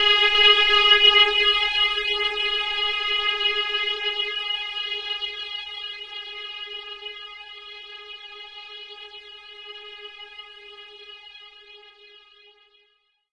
THE REAL VIRUS 08 - BANDPASS VOWELPAD - G#4
Big full pad sound. Nice filtering. All done on my Virus TI. Sequencing done within Cubase 5, audio editing within Wavelab 6.